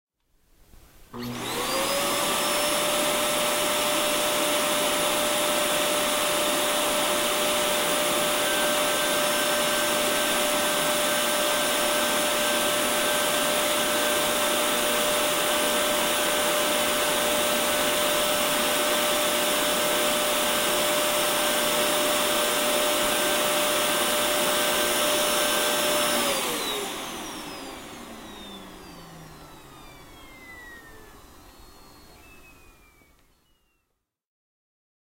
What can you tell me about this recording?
Vacuum On Run Off
A vacuum cleaner being turned on, running for a bit, and being turned off. A little more noise than I wanted, but it's not bad.
hoover, vacuum, vacuum-cleaner